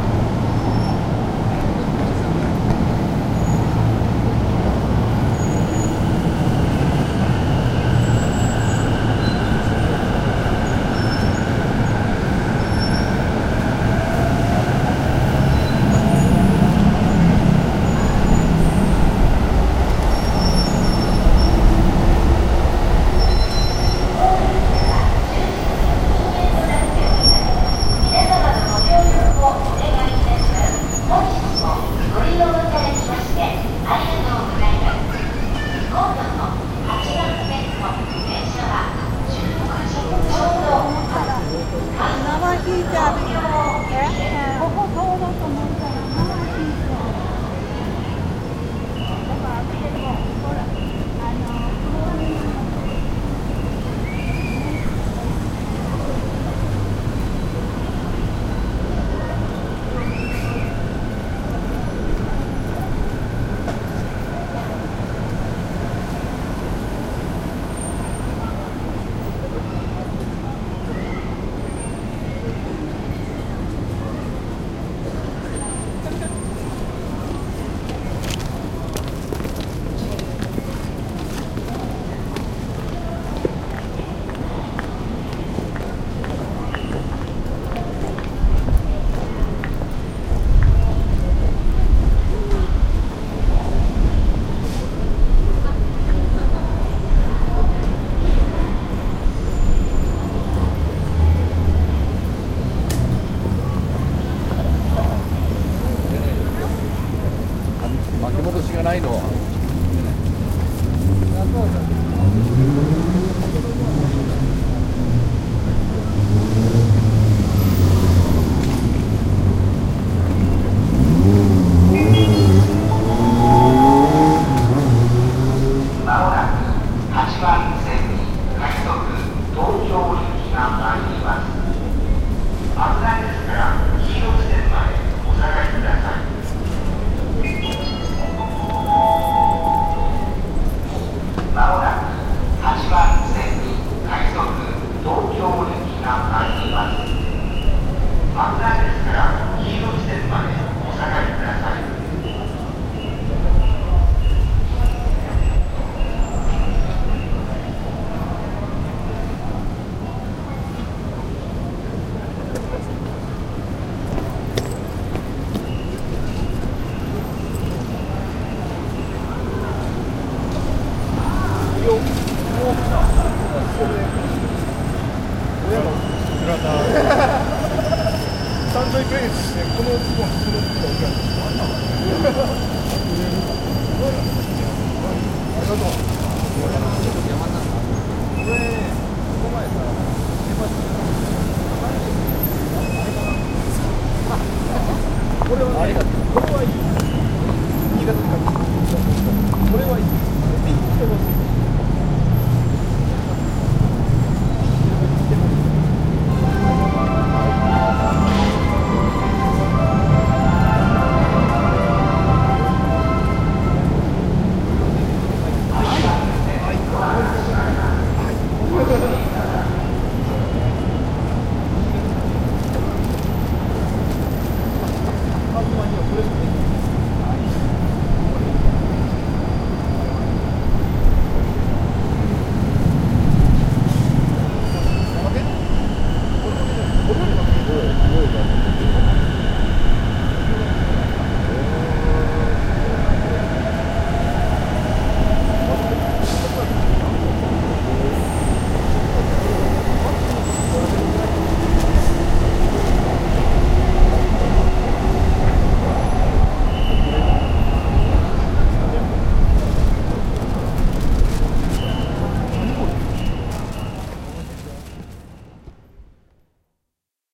nakano station kitaguchi mae 120stereo
Plaza in front of a typical crowded Tokyo Station. I recorded this in the evening in front of Nakano Station's North Exit (in front of Nakano Broadway). Trains come and go and lots of people come in go for shopping and partying. I used a stereo pair of mics at 120 degrees.
night, train-station, japan, public-transportation